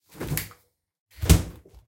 Fridge Door Open & Close
A fridge door being closed.
refrigerator, closing, open, opening, slamming, slam, door, doors, fridge, wooden, close, shut